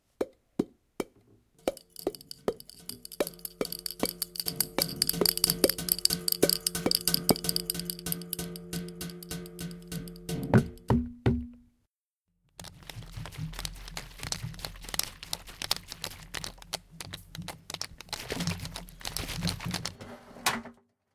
Soundscape ESDP group
This composition is made with sounds recorded by other participating schools.